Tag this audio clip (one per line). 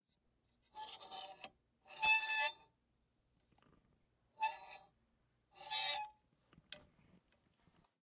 gate
fence
open
squeaky
close
iron
squeak
metal